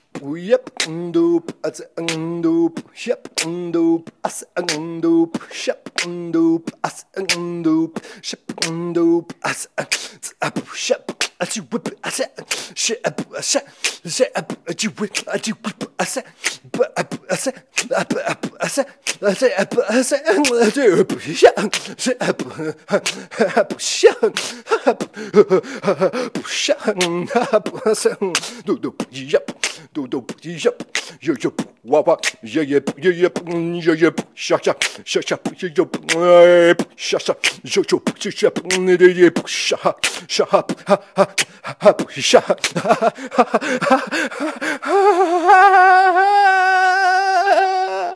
Strange vocal beat. Hope there is something usable in there.. - all done with my vocals, no processing.